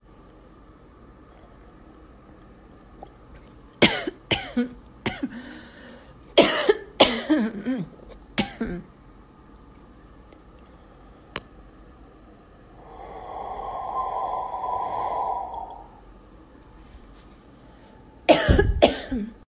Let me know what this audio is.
I exhaled into coffee cup while feeling very spooky